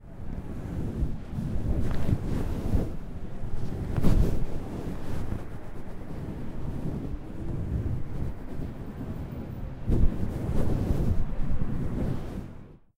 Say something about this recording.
Viento fuerte 1
Sound generated by the appearance of the air in outdoor. Hard intensity level.